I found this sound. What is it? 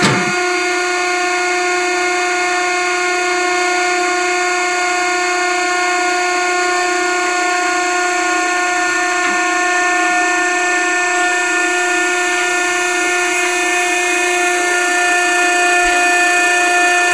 Dumpster Pressing 2
(CAUTION: Adjust volume before playing this sound!)
A short segment of the "Dumpster_Press_2" sound rendered as a separate clip for editing purposes.
Machinery, Mechanical, Science, Dumpster, Sci-Fi